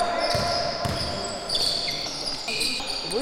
bote, fricció
Fricción zapatillas deporte
field-recording, friccion, zapatillas